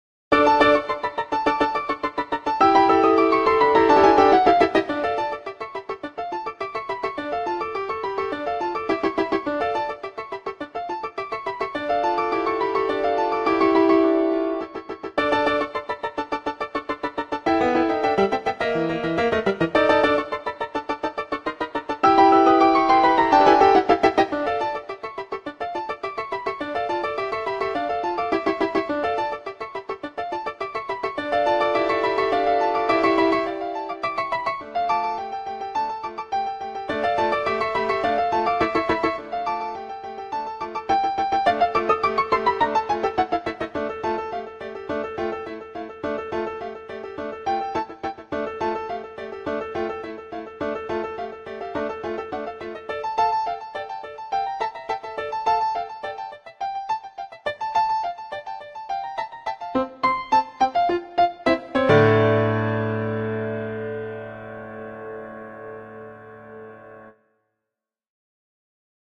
Composed by myself with noteflight